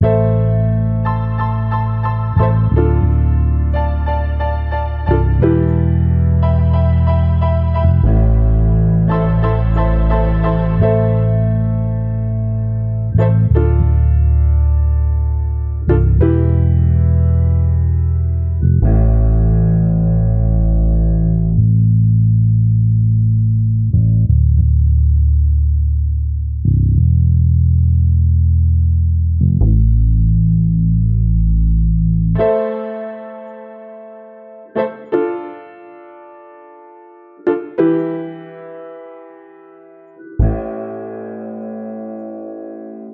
RH GETRIGHTWestcoastLoopStartBPM89
Westcoast starter loop